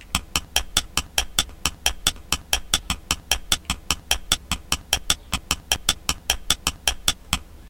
This sound is recorded by Philips GoGear Rage player.
There is not used real ratchet, but is replaced by something. I recorded the sound before year and don´t know, what i used. I maybe recall in time.